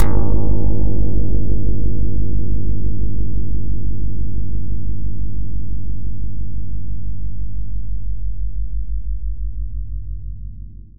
synth KS 01
Synthesis of a kind of piano, made by a Karplus-Strong loop.